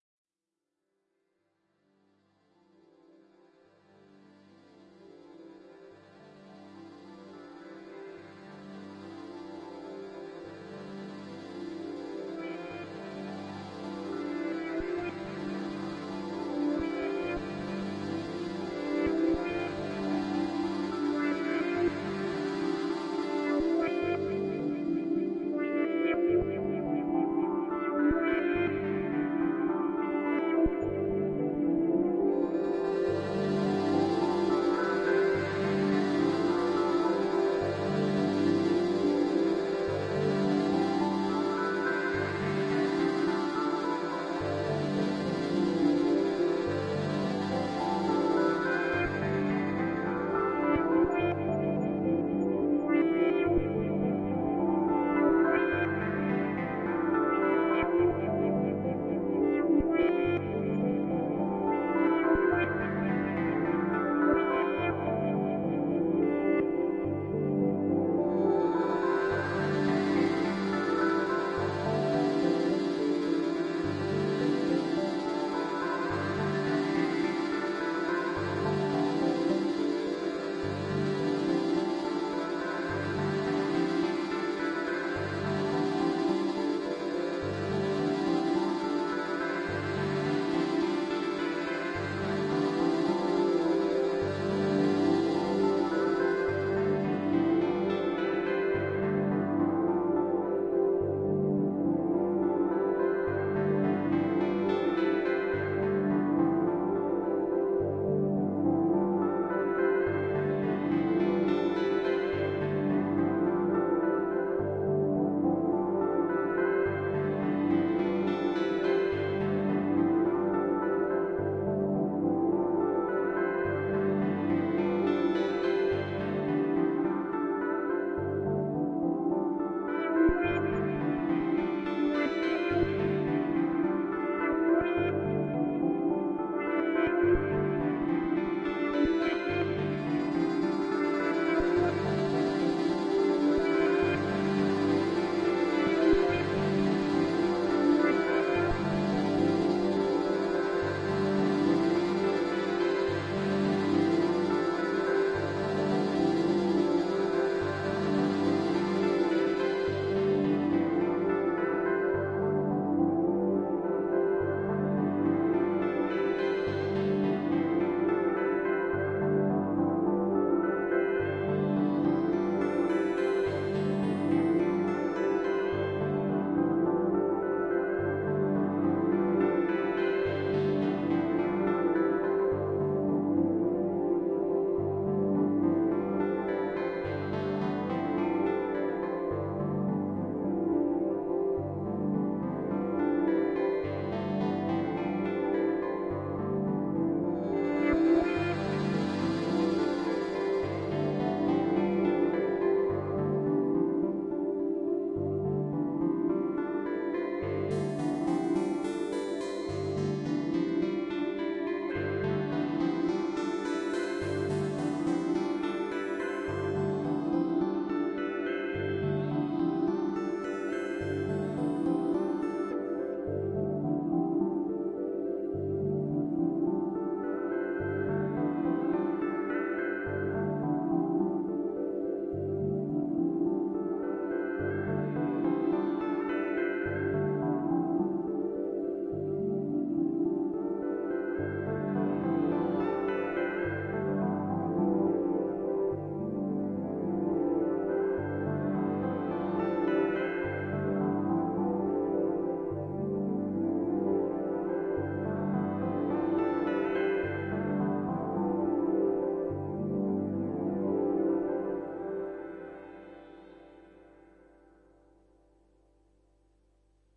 Shimmering ambience with Roland JV20800 and Kawai K4.